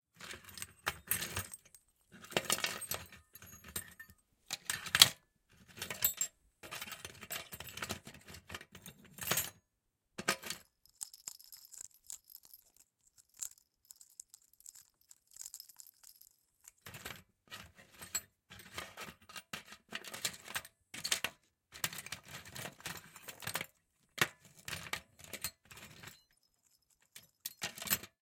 Recoreded with Zoom H6 XY Mic. Edited in Pro Tools.
Messing around with a box full of fishing baits and other junk hoping to find something that isn't there.
scavenge, instruments, garbage, junk